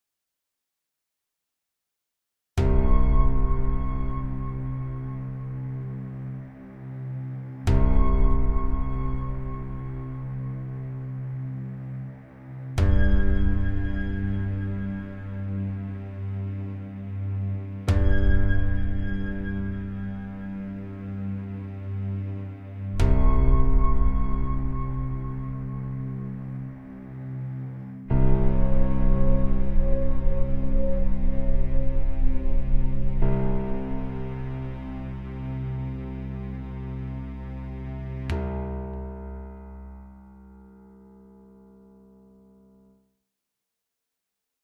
FILM SCORE
Made with Garageband for 30 minutes. You can use this for trailers and intros or whatever it can be. It's sort of dramatic/suspenseful. Only used my keyboard to make this.
bass, cinematic, digital, drama, drum, film, Garageband, intro, movie, orchestra, piano, score, synth, trailer, violin